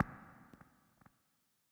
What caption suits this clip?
Sine waves processed randomly to make a cool weird video-game sound effect.
video, random, glitch, effect, processed, fx, pc, electronic, game